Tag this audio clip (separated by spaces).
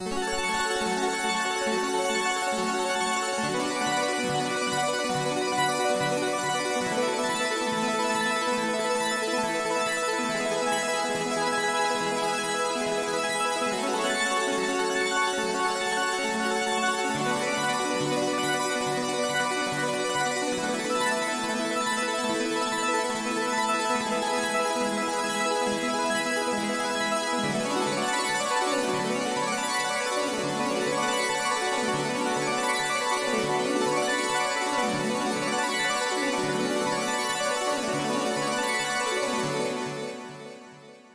retro loop 80s arpeggiator synth arpas reverb harps arpegio